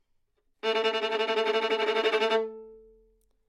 Violin - A3 - bad-dynamics-tremolo
Part of the Good-sounds dataset of monophonic instrumental sounds.
instrument::violin
note::A
octave::3
midi note::45
good-sounds-id::1906
Intentionally played as an example of bad-dynamics-tremolo
A3, good-sounds, multisample, neumann-U87, single-note, violin